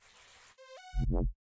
Schlafstarre 1 Schlafstarre 1
own, Sleep, paralysis, sounds, my